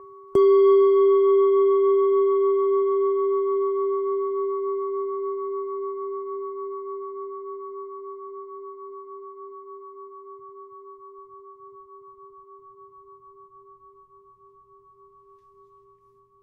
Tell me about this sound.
bell-bowl G-ish
A single ring of a medium-sized (brass?) ringing bowl with a soft mallet. Approximately G natural.
bell, bowl, chime, ring